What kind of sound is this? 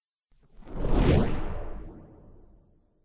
Magic Smite
A burning ball of smite magic being cast.
magic, smite, spell, wizard